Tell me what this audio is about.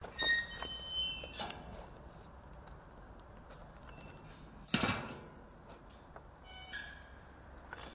Iron door is opened and closed
An iron door of a cemetery in Tyrol is opened and closed wile a woman walked in.